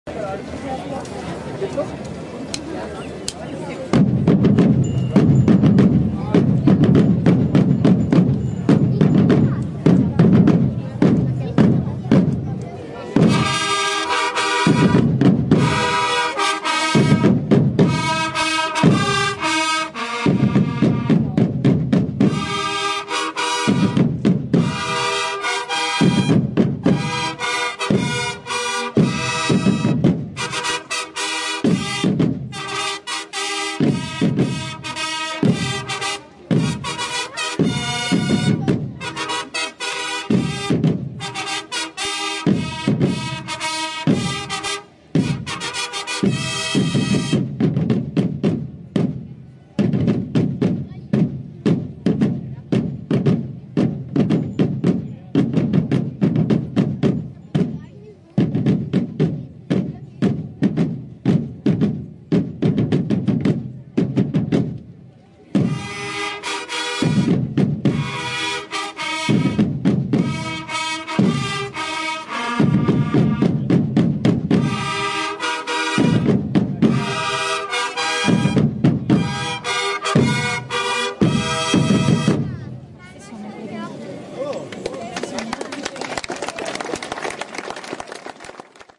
Fanfarenzug Ottheinrich (1)
drum, drumm, drumm-roll, drummroll, drums, fanfare, medieval, percussion, roll, trumpet, trumpets